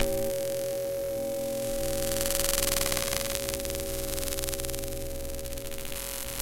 Record noise loop recorded in cool edit with ION USB turntable and time stretched.
noise, vinyl, loop